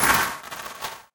Sound design hit accent - scandium.